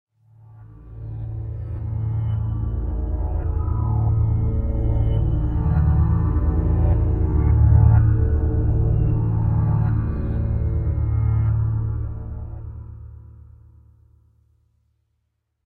Horror Transition
A transition created using the Chuck music programming language. Sound was obtained after processing the following file:
After being processed with Chuck, some effects were applied with Sony Sound Forge Pro 10.
cinematic, demon, devil, drama, evil, fear, ghost, ghostly, haunted, hell, horror, nightmare, paranormal, phantom, scary, sinister, sound, spectre, synthesis, terrific, transition